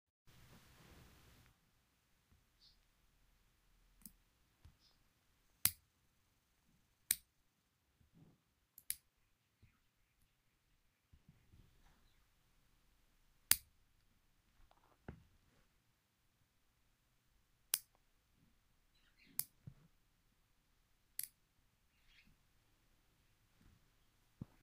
nail clipper
nails, fingernails, nail-clippers, OWI